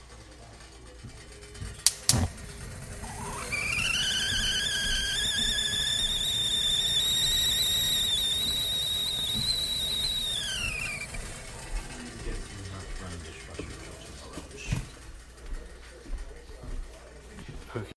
A tea kettle boiling and whistling